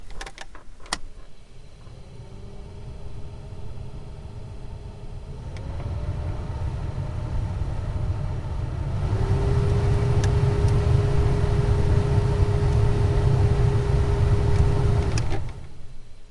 MrM ElantraInteriorFan
Interiour fan on defrost, 2003 Hyundai Elantra. Edited with Audacity.Recorded on shock-mounted Zoom H1 mic, record level 62, autogain OFF, Gain low. Record location, inside a car in a single garage (great sound room).
defrost, elantra, fan, foley, h1, interior, zoom